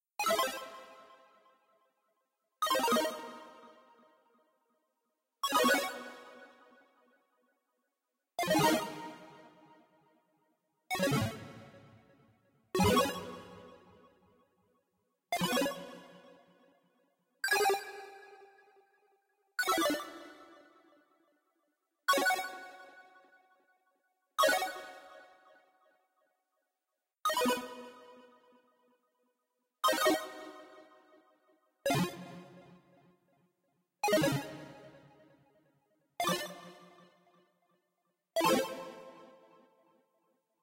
This is pack is comprised of short sound FX with an "old school" touch. I was trying to create some fx, but it turned out this pack sounds too cheerful for my project. Maybe you could find some sound of your interest in it.